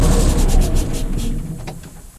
piranha sluk
Piranha pmb engine stops
diesel, piranha, pmb, stop